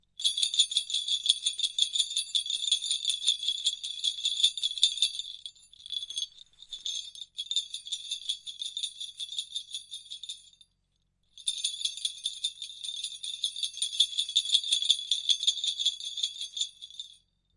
jingle jangle bells stereo
5 bells being jingled or jangled.
Recorded with H5 Zoom with XYH-6 mic.